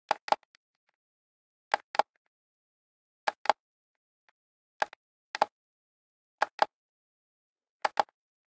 button press plastic alarm clock
press, alarm, clock, plastic, button